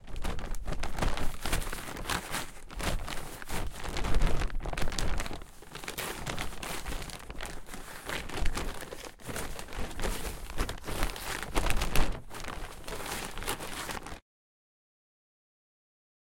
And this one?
4. Manipulation with a pool tarp
Plastic pool tarp, outside, close
CZ, Czech, Pansk, Panska